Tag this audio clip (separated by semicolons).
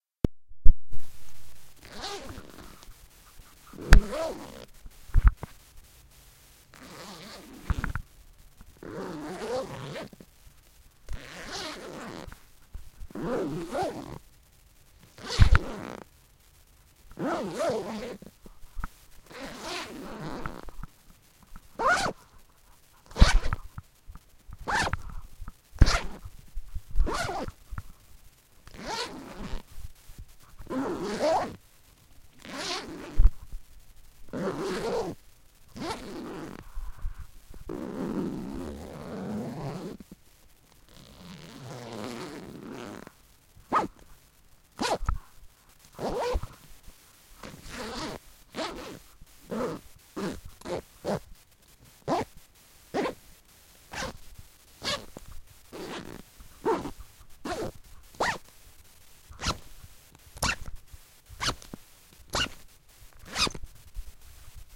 zip; zipper; zipping